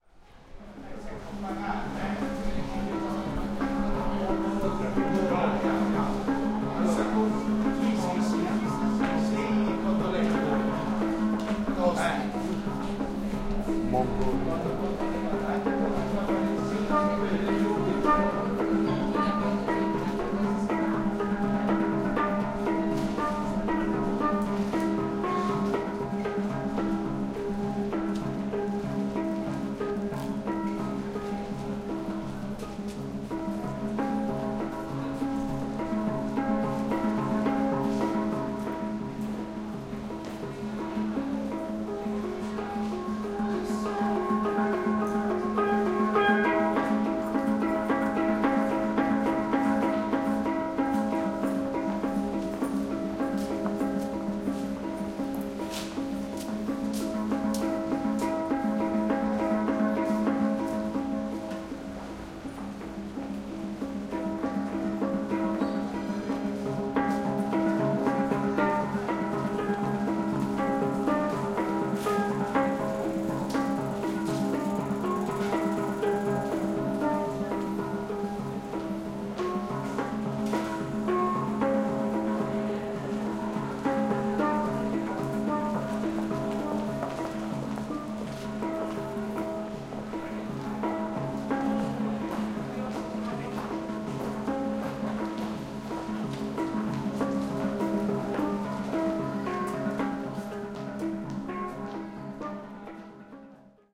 A Gamelan like instrument recorded on the street in Amsterdam, Holland.
exterior, field-recording, music, nieuwendijk